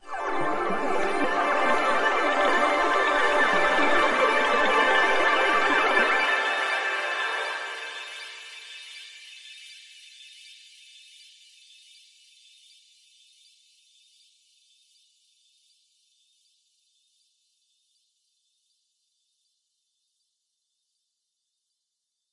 Synth bubbles sound I created through my music software.
bubbles, electronic, electronic-bubbles, synthesized-bubbles, synthesizer-bubbles